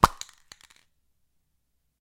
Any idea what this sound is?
Spray Paint Cap Off 2
Removing a spray paint cap, take 2.